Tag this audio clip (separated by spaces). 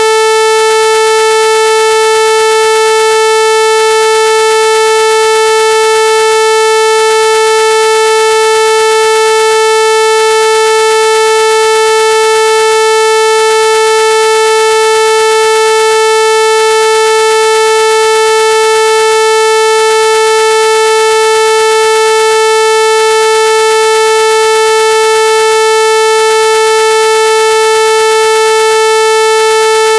chip saw